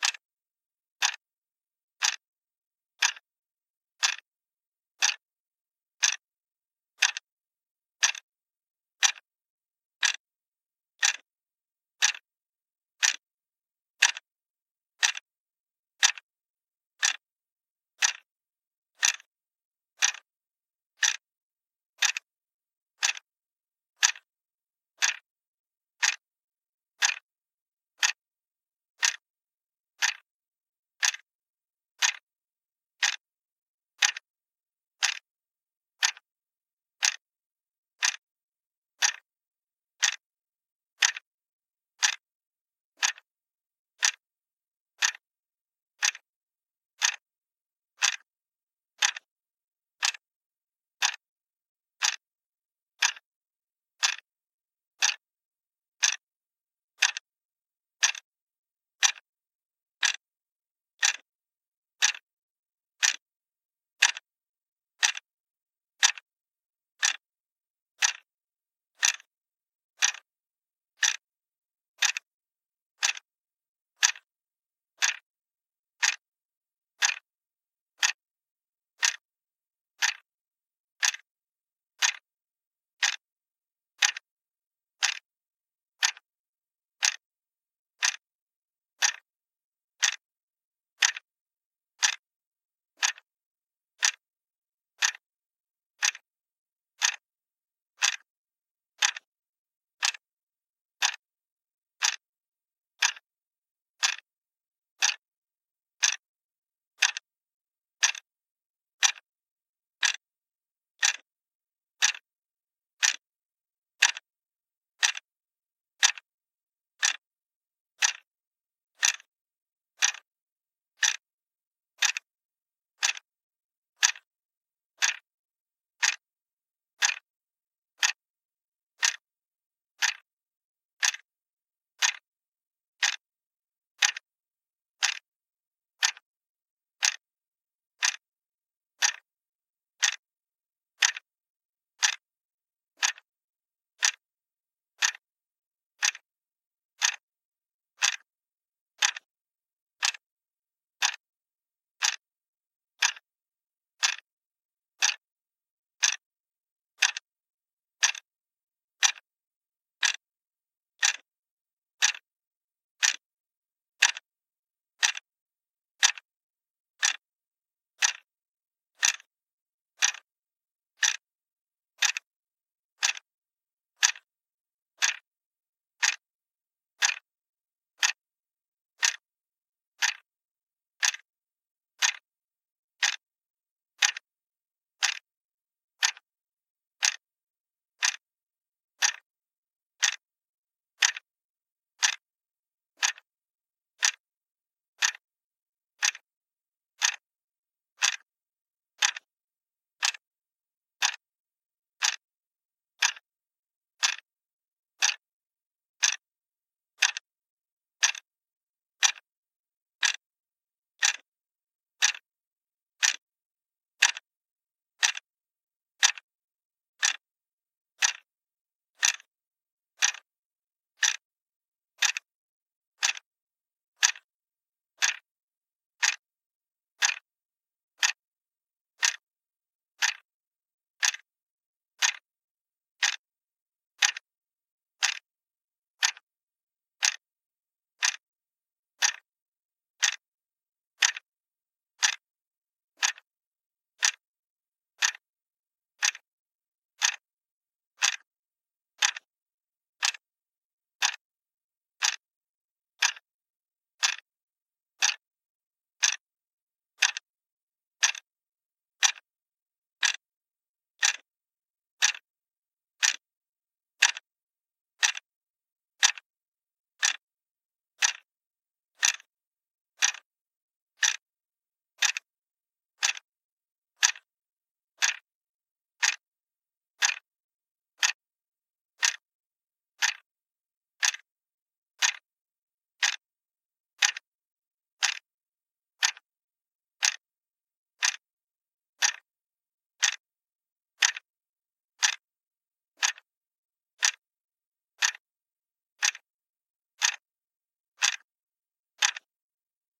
A plain plastic alarm clock clicking